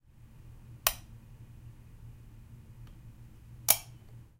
Lamp Switch On/Off
Point, Field-Recording, University, Park, Koontz, Elaine